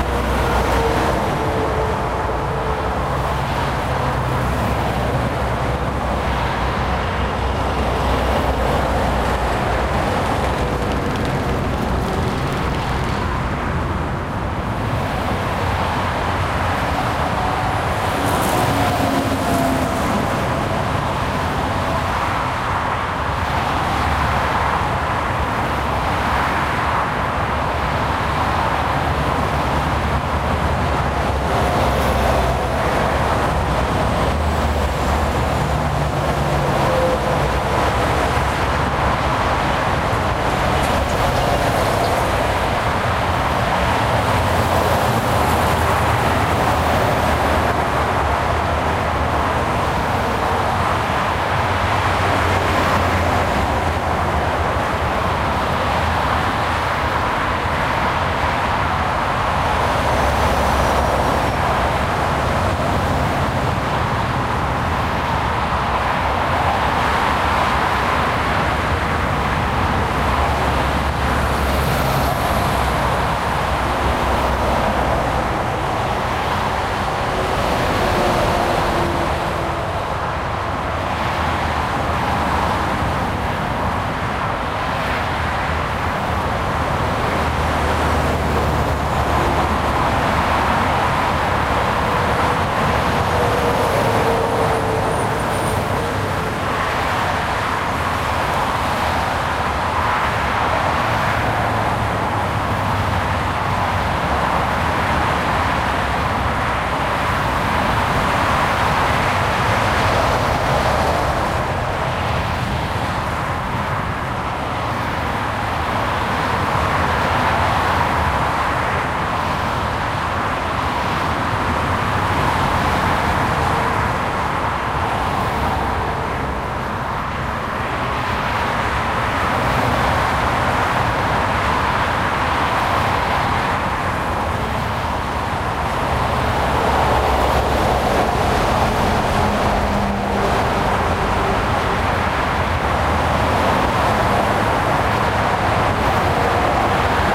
A busy highway on a monday morning, somewhere in The Netherlands.
Standing about 10 metres from the highway.
Traffic going from left to right in the stereo image. You can hear the traffic on the other side of the highway as well, going from right to left.
Recorded with a ZOOM H2N.